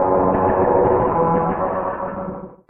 Also used this in a song called "Lullaby". The line was supposed to sound somewhat like a lullaby.